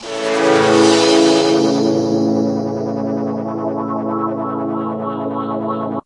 Wobble Slicer Pad